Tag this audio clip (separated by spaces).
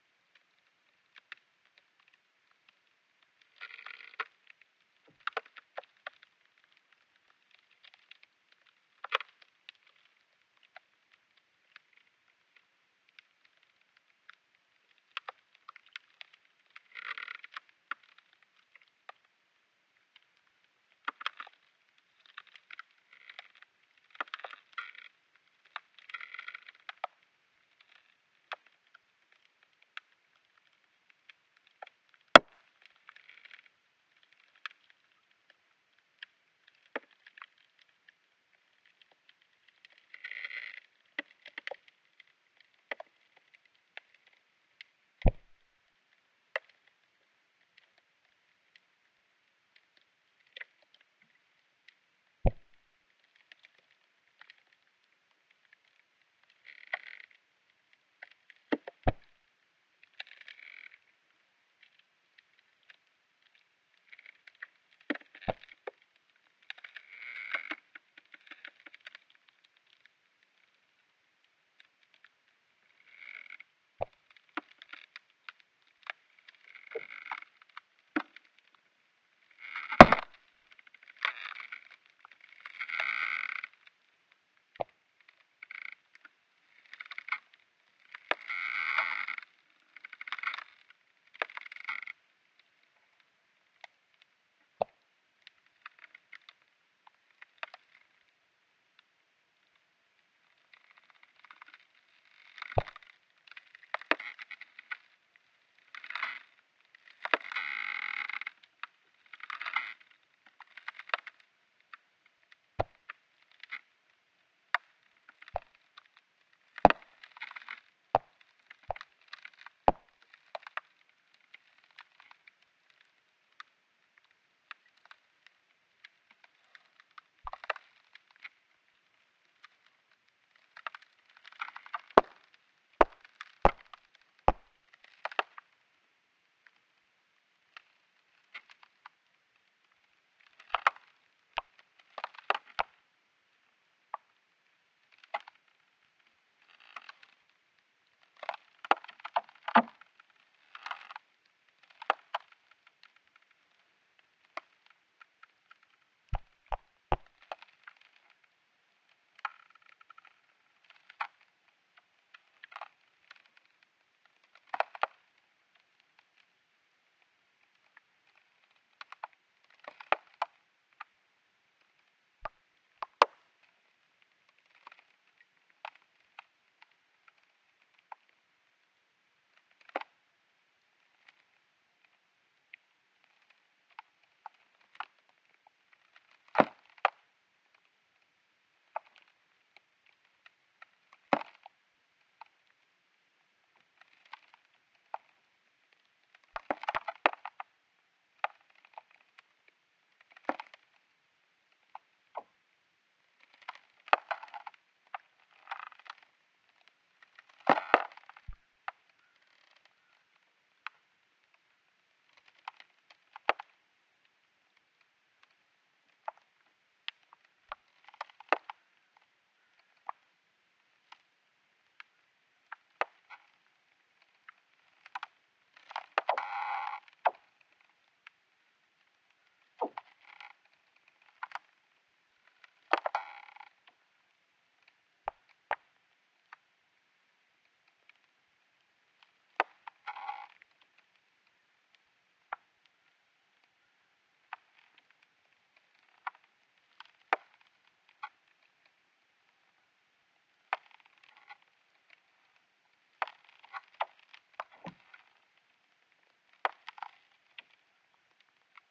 field-recording craking ice